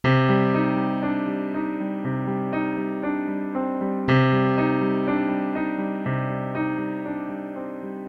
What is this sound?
dark piano-accomplisment with left hand, to replace bass or use as intro.